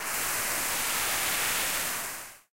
Sunvox Noise 2
A static type noise, synthesized using Sunvox.